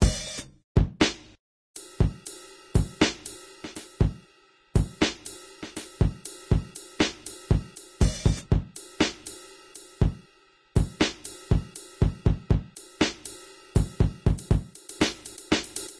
Breakbeat drum loop
another breakbeat loop i created from beaterator
rough, mix, breakbeat